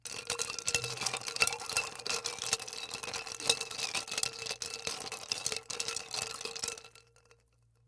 Stir Ice in Glass FF378
Liquid and ice stirring in glass, medium-fast, utensil hitting glass
Liquid, glass, ice, stirring, utensil